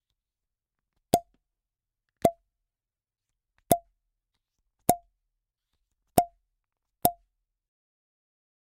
champagne plopp bottle open plop blop
Clean audio, so it sounds a little bit off. You have to reverb it by yourself as you need it.